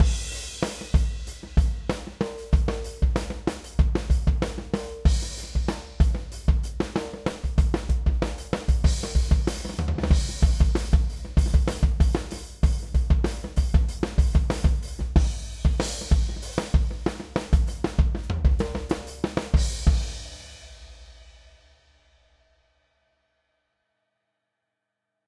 zeprock groove
drum part from song, "a la bonham" style 95 bpm